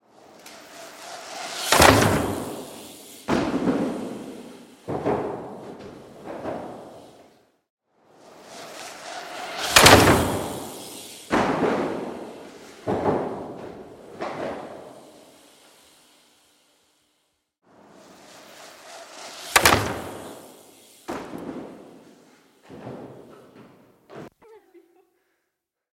BMX, Indoor, Ride Wooden Ramp, Approach Jump Land, Mono
Sounds from my vintage archive, background noise and other issues are present.
Get brand new, high resolution BMX sounds here:
Gear used: analog tape recorder Nagra IV + Sennheiser ME 66 microphone.
Recorded for the Projection student movie, 2014, Zlin, CZ.
bicycle, ride, extreme, bmx, riding, bike, sport